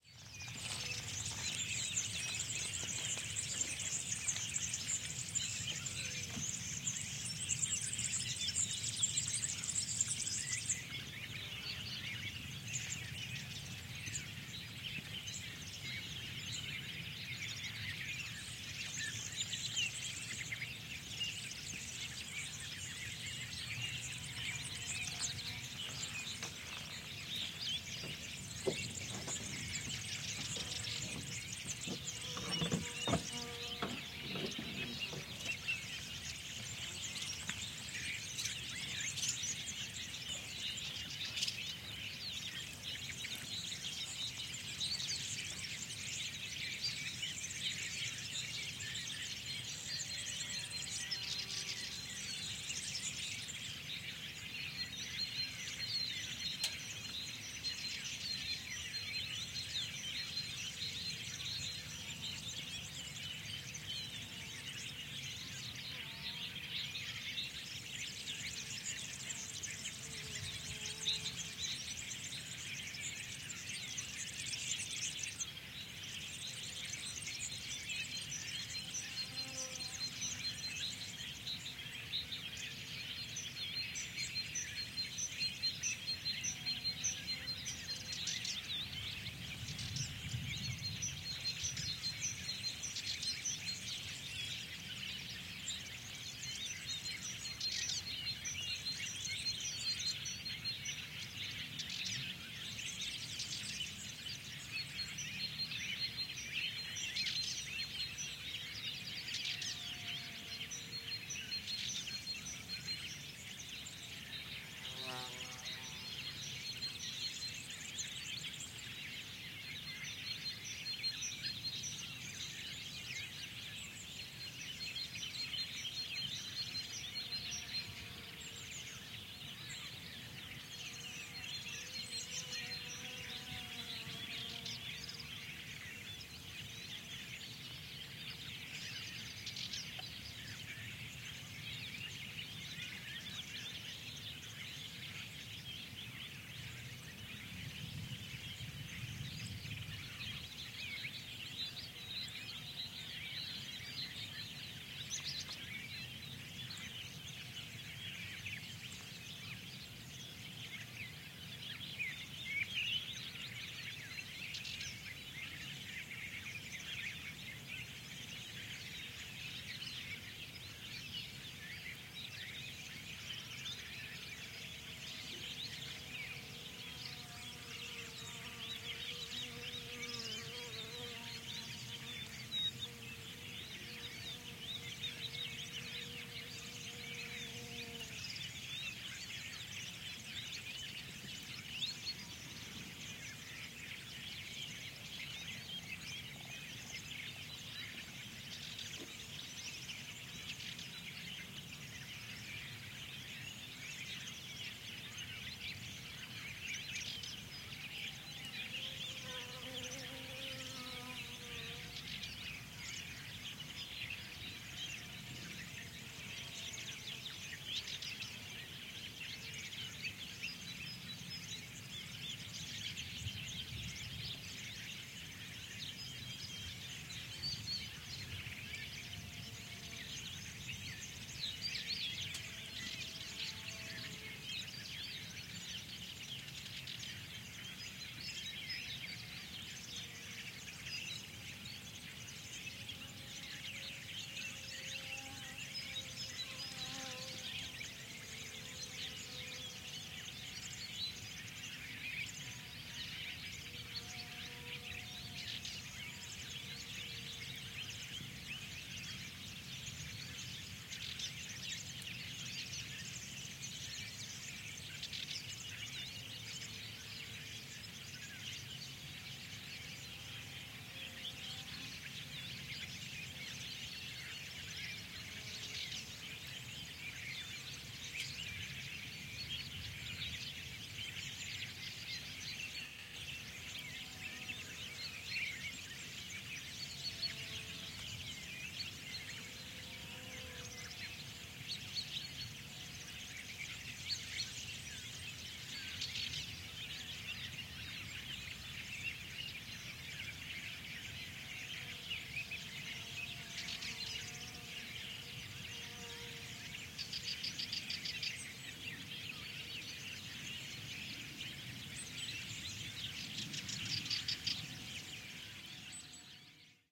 20200129 end.of.winter
Quiet morning ambiance in the fields, mostly bird tweets and insect buzz, no human presence. Recorded near Santa Eufemia (Cordoba Province, Andalucia, Spain). Audiotechnica BP4025 into Sound Devices Mixpre-3 with limiters off.
birds, field-recording, mountains, nature, parus-ater, Spain, winter